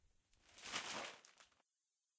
Crumpling Newspaper
Crumpling a newspaper.
{"fr":"Froissement de journal","desc":"Froisser un journal en papier.","tags":"papier page feuille froisser"}
paper, newspaper, crumpling, sheet